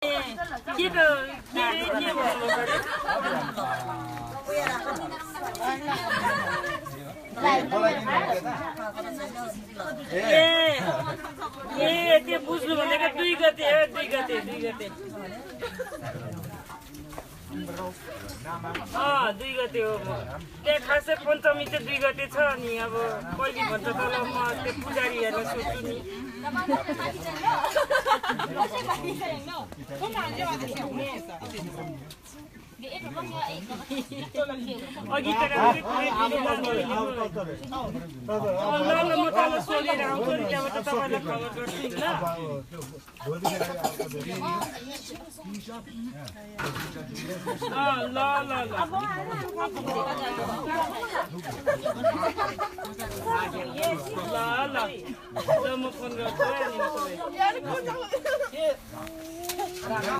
Nepalese voices
Conversations outside, recorded on iphone
field-recording, Nepal, voices, people